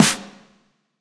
a snare sample I made based off a DM5 and a 707 snare sample as a base alongside lots of processing!